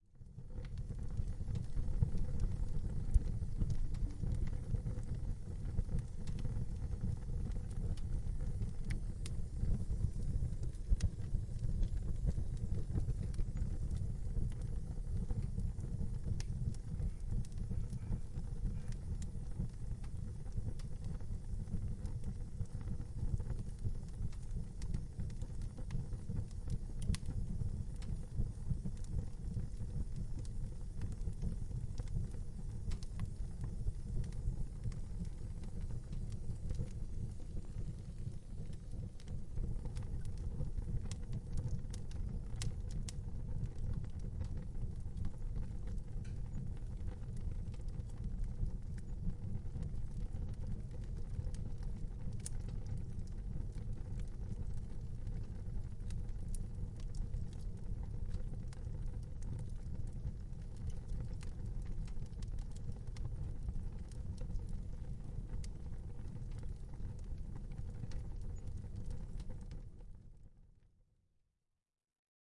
A fireplace burning pleasantly. Ahhhhh.
Fireplace, stove, flames, flame, fire, sparkle, place, crackle, burning, burn